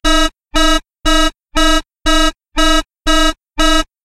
An electronic, looping alarm. Could be a futuristic wake-up call or an emergency signal.
SofT Hear the Quality
Electronic, Alarm, Beep, Alert, Emergency